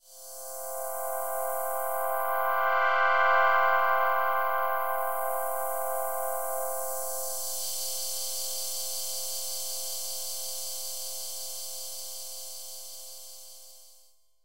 MemoryMoon pad-luminize
This is part of a soundesign work for the new memorymoon vsti that emulate the legendary Memory Moog synthesizer! Released after 15 moths of development by Gunnar Ekornås, already known for the amazing work on the Arppe2600va and Minimogue as member of Voltkitchen crew.
The pack consist in a small selection of patches from a new bank of presets called "moon mobile bank", that will be available as factory presets in the next update ..so take it just like a little tease.
this is warm sweaping pad with double filter in action. Can be handy for both scoring an music making. Onboard effects, no additional processing.